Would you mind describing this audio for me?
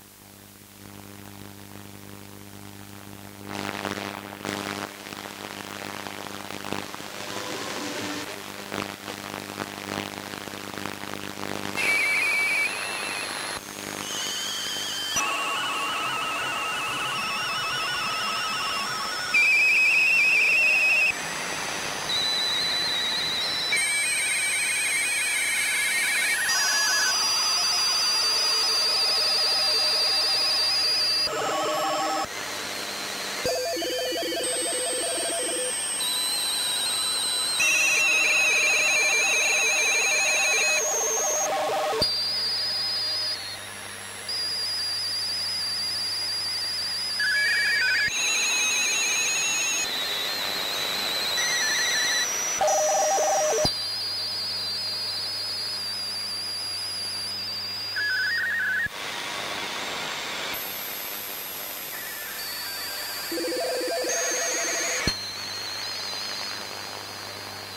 Interesting noises in the shortwave 21m band, around 14kHz.
Sounds like digital communications of some sort.
If you have a shortwave receiver, have a look and see if you can hear them.
21m-band, communication, interesting, noise, radio, short-wave, shortwave, strange, telecom, transmission, unidentified, unknown